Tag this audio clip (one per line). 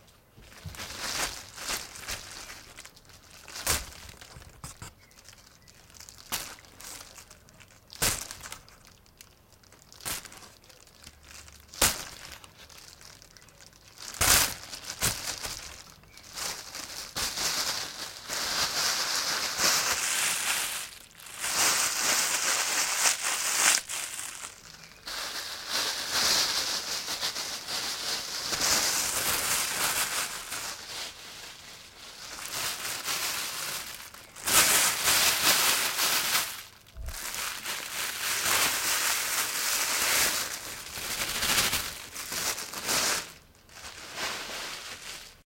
bag-sounds
crackling-sounds
crinkling-sounds
OWI
plastic-bag-crackling-sounds
plastic-bag-crinkling-sounds
plastic-sounds